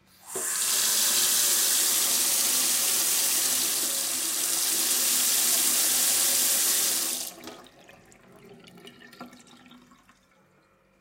20060727.kitchen.jet
sound of a jet of water flowing int o the sink. Sennheiser ME62 > NZ10 MD
faucet, jet, kitchen, water